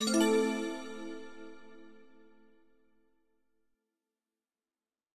A different bell rings out to inform customers via the public announcement speaker system.
announcement, attention, call, commutor, custommers, public, sound, speaker